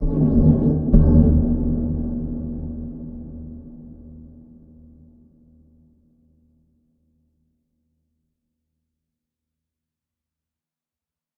scary background 4
creepy, drama, Gothic, horror, scary, sinister, terrifying, weird